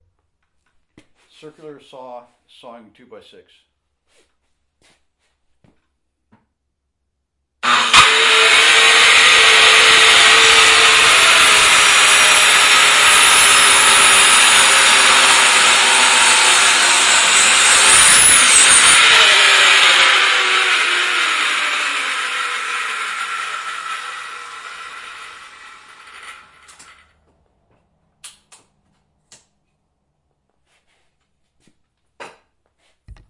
Circular Saw Sawing 2x6
Circular saw sawing a 2x6 tools
2x6, circular, garage, power, saw, sawing, tool